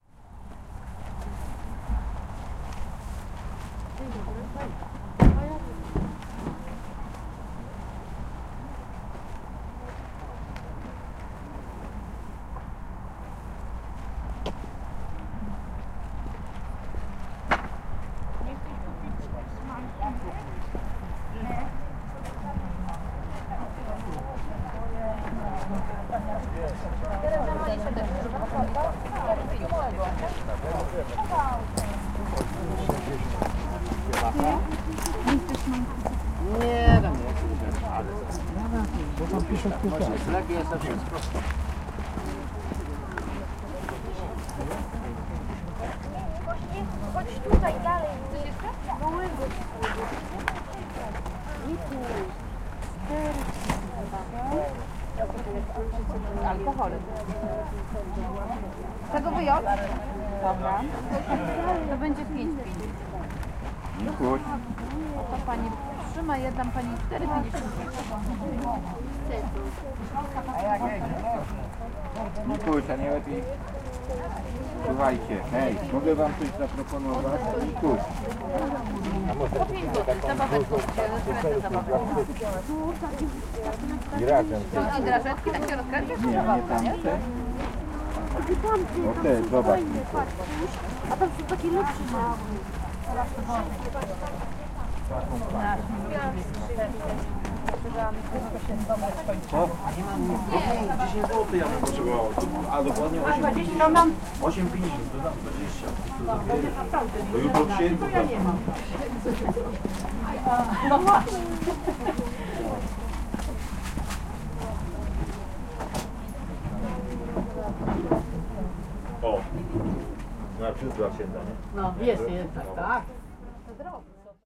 german sweets KOlbudy 12.03.2016
12.03.2016: Kolbudy village in Poland. Fieldrecording made by my student Alicja Zabrocka. The ambience of the stand with German sweets (hububb, people's voices, selling-buying).
village, Polish, fieldrecording, sweets-stand, selling, voices, people, Kaszuby, Poland, buying